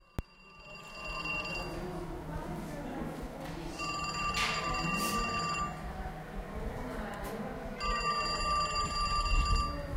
An old fashioned phone ringing
Old-fashioned, phone, ringing